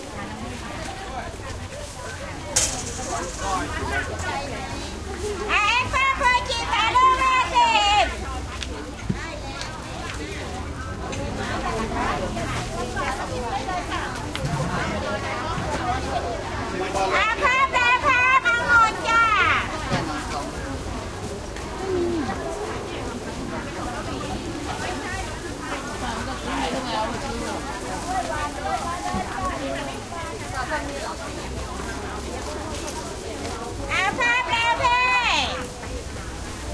Thailand Bangkok side street market walla voices +vendor shouting periodically close WARNING LOUD, and distant traffic